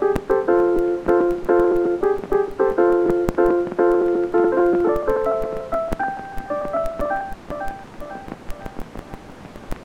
Quilty's Old School Piano
A absolutely priceless piano line. This is one of my favorites. By me, might fit into a house song or something. Thanks for all the downloads :D
big-beat, piano, classic, house